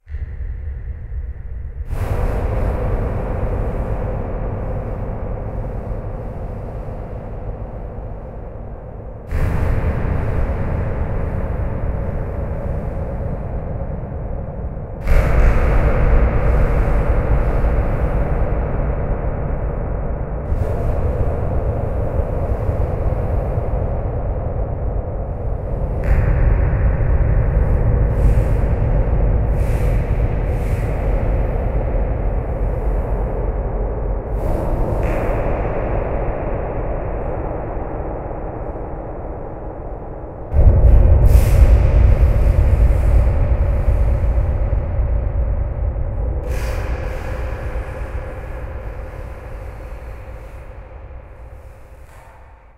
Explosion Simulation
Explosion sound created using a Zoom H1 and tempering with metal fibers near the mic capsules. Added Verb and Pop Compression for better flexibility. Wouldn't mind some credit, Thank you. :) Stay tuned for more audio effects. Due note that they will improve.
tnt, boom, echoe, uncompressed, nuke, kaboom, explosive, explosion, shot, bang, artillery, anti-matter, shotguns, gun, bomb